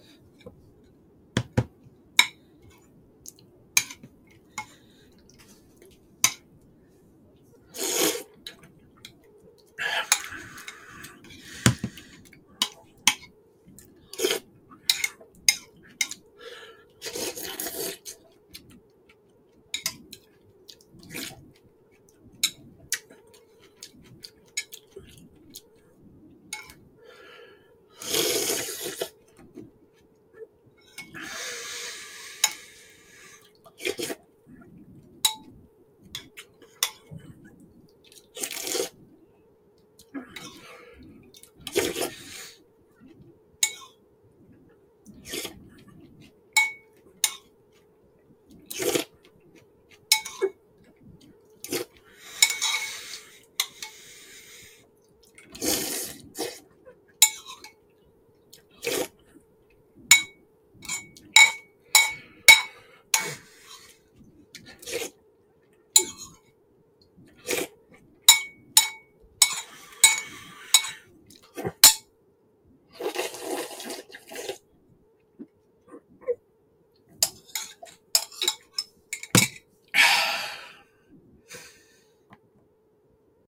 Eating slurping borscht soup at the festival
eastern, lunch, russian, food, soup, taste, dishes, slavic, bowl, beetroot, slurping, borscht, red, smak, eating, ukraine, festival, coisine, ukrainian, beet, lithuanian, european, polish, cabbage